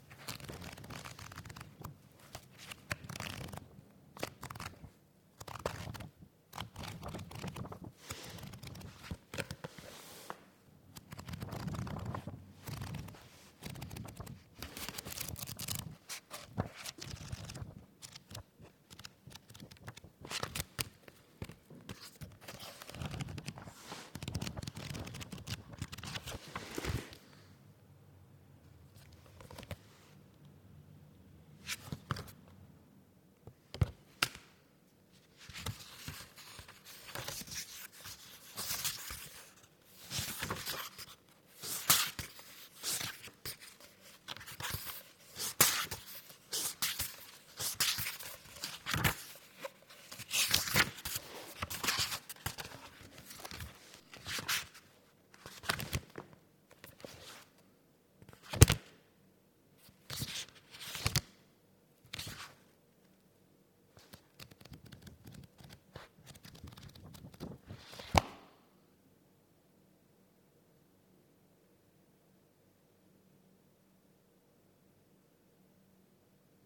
Foley sounds of book browsing recorded in room
includes
- random browsing
- single page turn
- book close, drop
- silence for noise print, correction
Recorder: Tascam HD-P2
Mic: Rode NTG-2
Processing: none